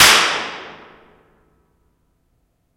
Basement Impulse-Response reverb 5m away medium-pitched clap
5m, away, Basement, clap, Impulse-Response, medium-pitched, reverb